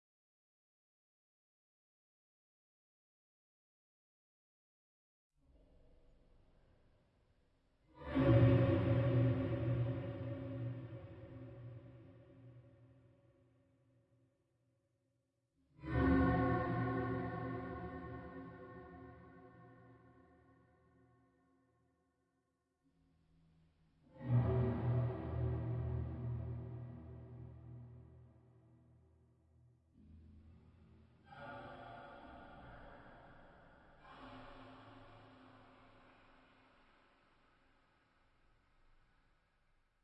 Echo Impact
Dark Drone Ambient made with drawer and Reverb FX Chain
ambient, dark, echo, horror, huge, impact, reverb, soundscape